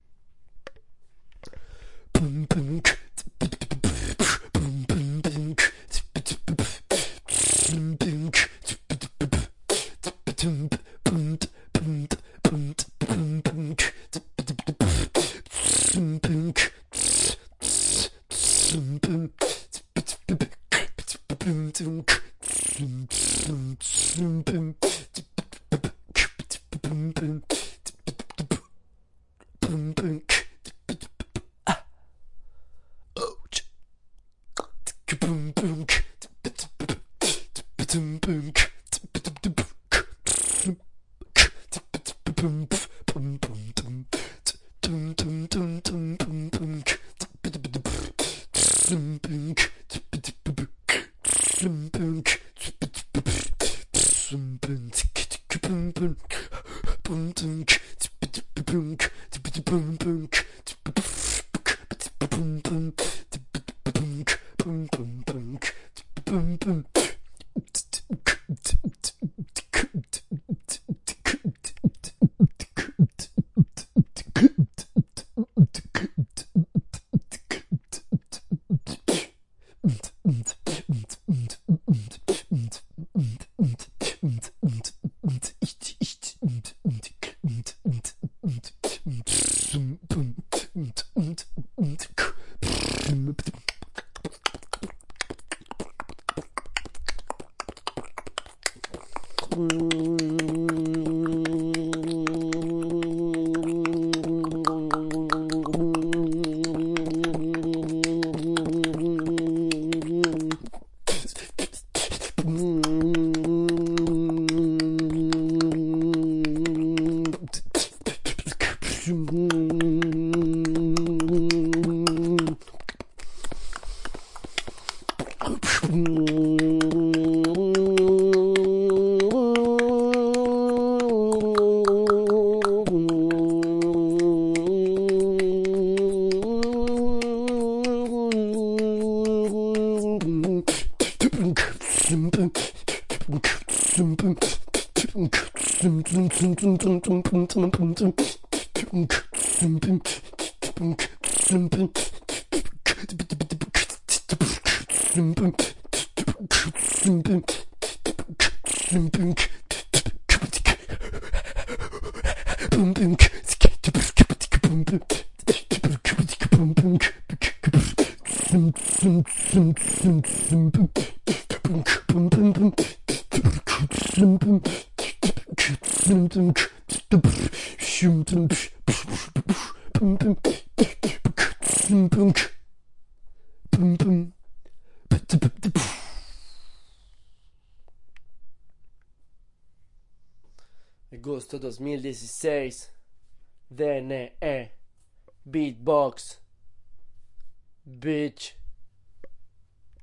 Improvización de beatbox, uso libre. free use.
beat, improvisation, sound, box